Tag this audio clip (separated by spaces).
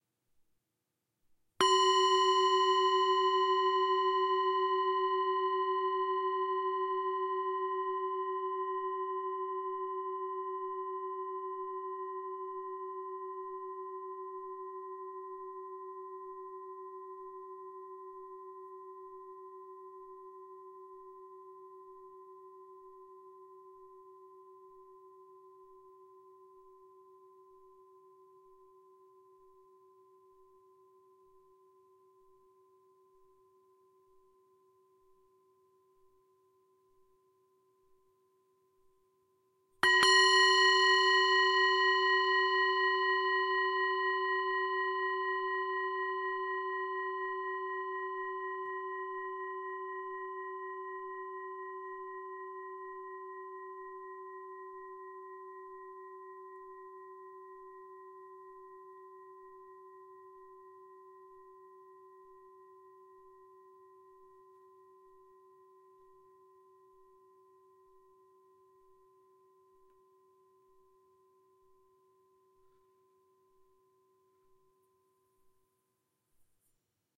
bell bowl brass bronze chime clang ding drone gong harmonic hit meditation metal metallic percussion ring singing-bowl strike tibetan tibetan-bowl ting